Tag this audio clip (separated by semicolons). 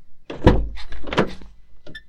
outside; door; car; open